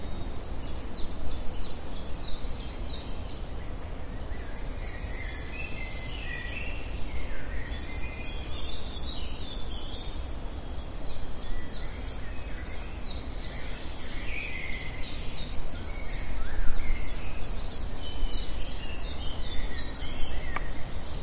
birds are singing 017
Singing birds in the spring forest.